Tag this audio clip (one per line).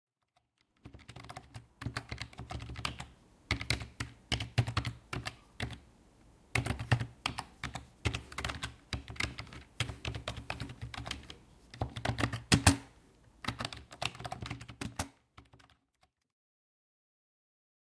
chatting
fingers
IT
keyboard
programming
typing